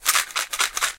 Shaking tablet1
bottle, medicine, tablets, shaking, shake